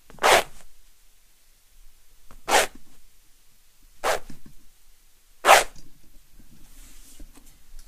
Me, scratching the upholstery on my computer chair! (I'm using it to mimic a cat scratching someone, but YMMV.)